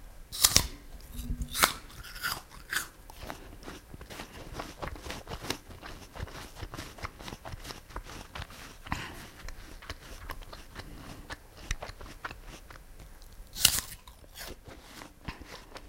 Apple Crunching
Eating a crunchy apple
food, apple, fruit, munching, crunchy, eating